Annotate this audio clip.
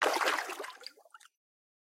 water swimming 5
Recording of swimming.
Since the Sony IC Recorder only records in mono, I layered 3 separate splashes sounds(1 left, 1 right, 1 center) to achieve a fake stereo sound. Processed in FL Studio's Edision.
layered, pool, sony-ic-recorder, swimming, water, wave